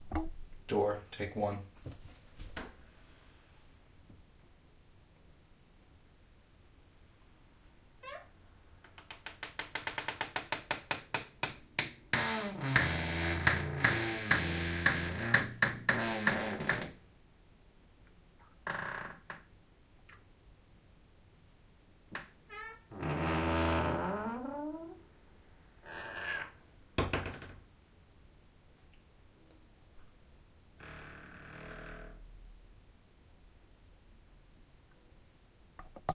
AAD Door Creak 1
This is one of our raw recordings no treatment. This is an M-S Stereo recording and can be decoded with a M-S Stereo Decoder.
Close, Closing, Creak, Creepy, Door, House, Old, Open, Squeak, Wood